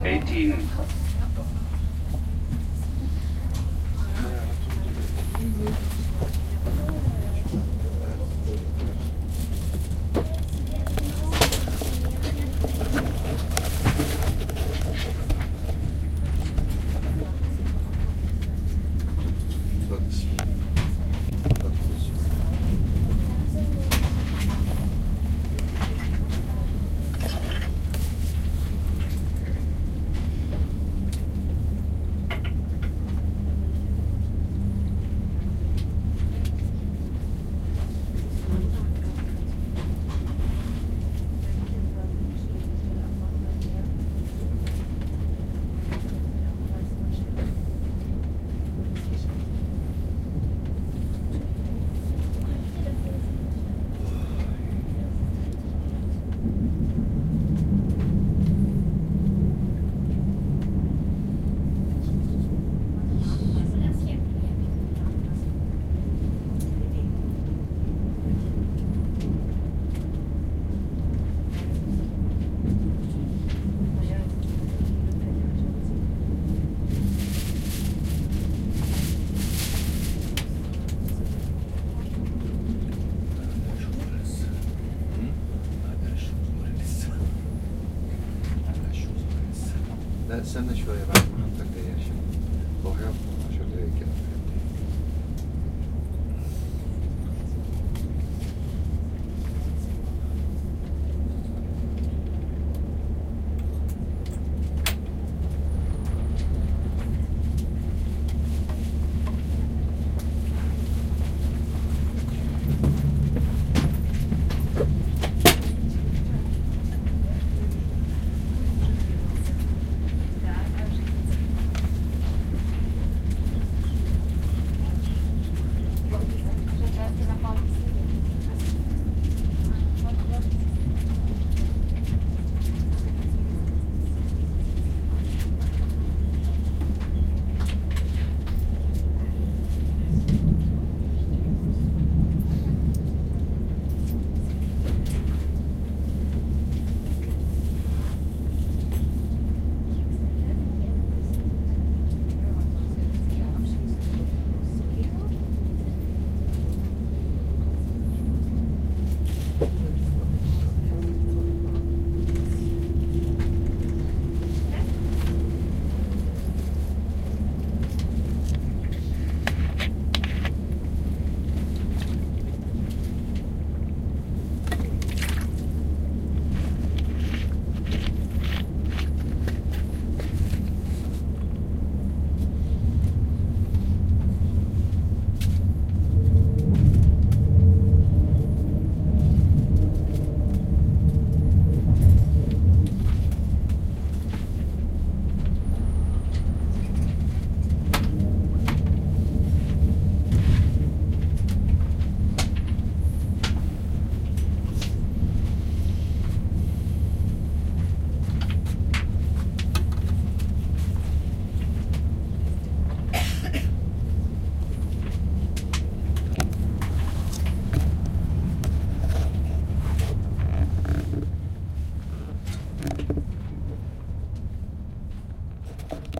train ride in germany
ICE ride from göttingen to berlin
fahrt, field-recording, ICE, zug